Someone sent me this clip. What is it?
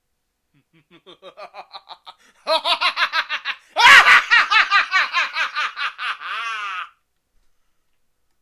evil laugh-17
After making them ash up with Analogchill's Scream file i got bored and made this small pack of evil laughs.
cackle, evil, horror, joker, laugh, long, lunatic, mad, male, multiple, scientist, single, solo